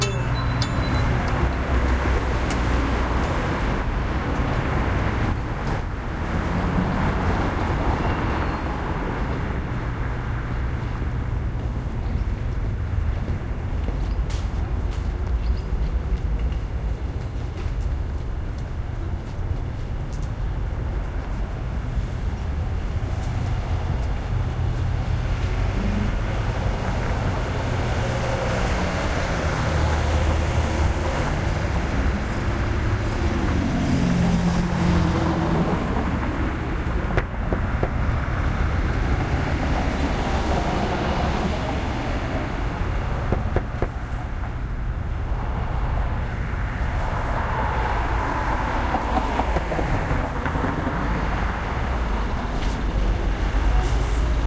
Door Open to Traffic
door, open, traffic